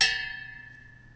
sound is me hitting a half full metal canister with a spoon (another clang than the first file for variety)